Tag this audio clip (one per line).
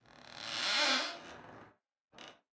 floor
creaky
squeak
wood
house
rocking-chair
hallway
settling
creak
floorboards
haunted
door
boards
wooden
chair
rocking
creaking